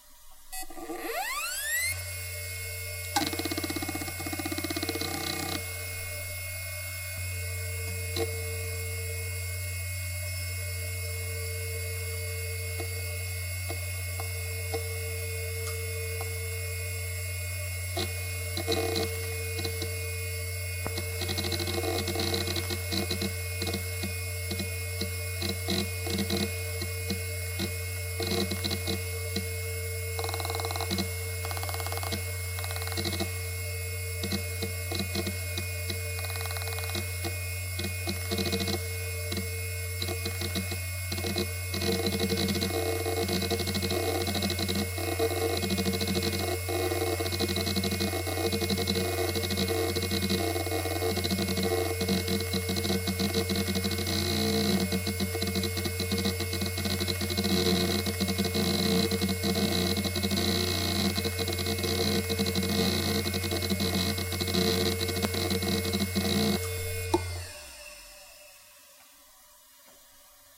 Samsung Voyager 10200 - 5400rpm - BB
A Samsung hard drive manufactured in 2000 close up; spin up, writing, spin down. (sv1022d)